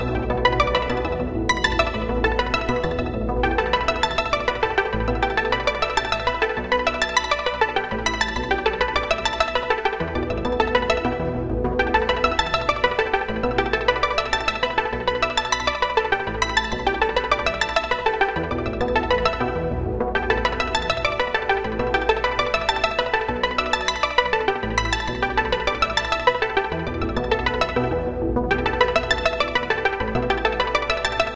Hong Kong Magica 2
second take on hong kong magic,never been to hong kong but i wanted o created that
feeling.
made in ableton live 10